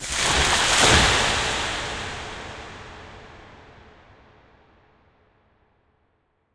microphone + VST plugins